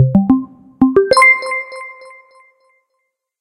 131659 bertrof game-sound-intro-to-game & 80921 justinbw buttonchime02up 8
attention, sound, chime